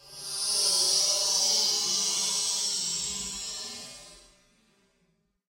A strange, alien sound I made by blowing on the mic with some effects